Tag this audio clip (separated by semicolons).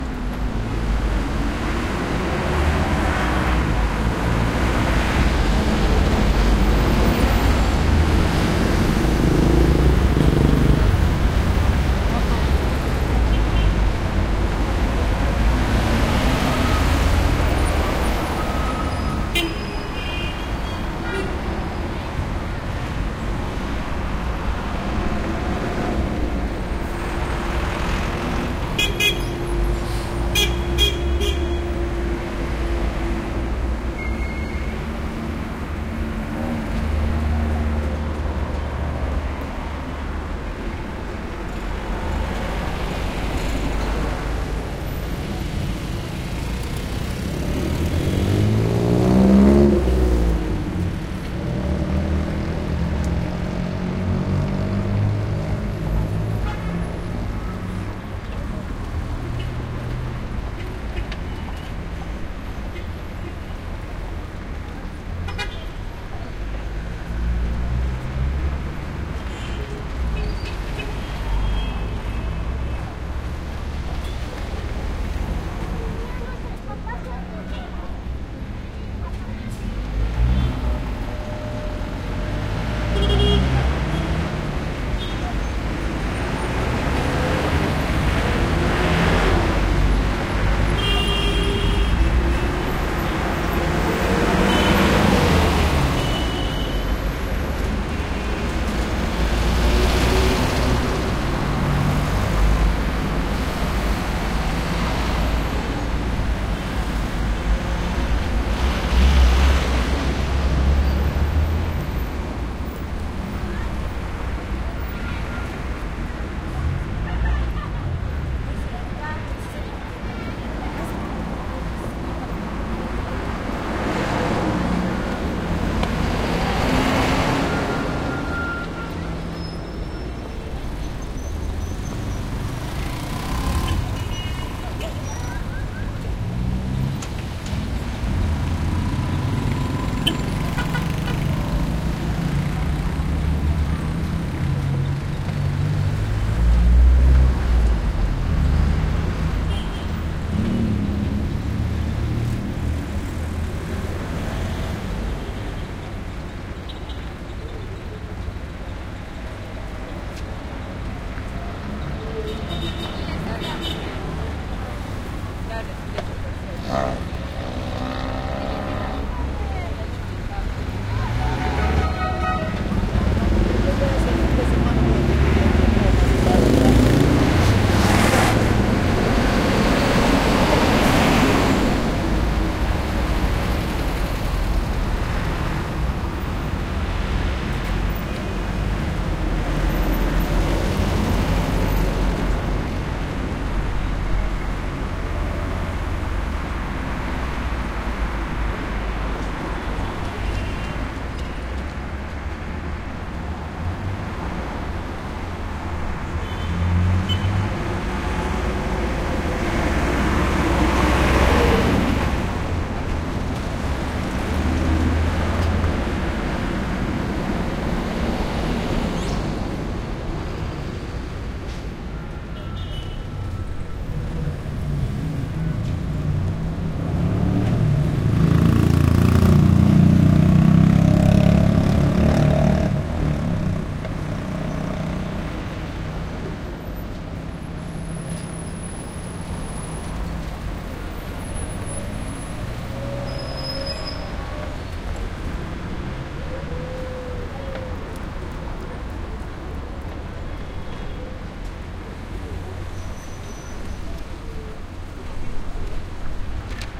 America; busy; intersection; medium; pedestrians; Peru; South; traffic